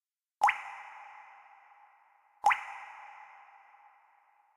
large.cave.acclivity Drip1.mix
mix, drip, processed, reverberation, cave